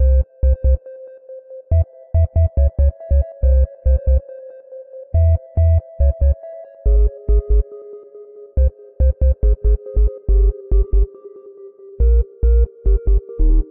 sinewave plings with some delay and a sinewave bass! cheerio :)
loop, bass, synthesizer, electronic, atmosphere, dark, 140bpm, synth, sine